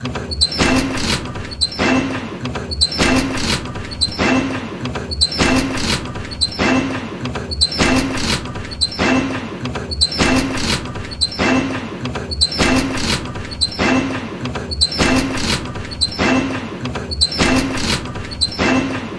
Factory machine 001
Sound design elements
Perfect for cinematic uses,video games.
My custom sample recorded from the field.
Recording gear-Zoom h6 and microphone Oktava MK-012-01.
Cubase 10.5
Sampler Native instruments Kontakt 6
Audio editor-Wavosaur
buzz, buzzing, drill, engine, factory, generator, hum, industrial, loud, machine, machinery, mechanical, metal, mill, motor, operation, power, run, running, saw, sfx, sounds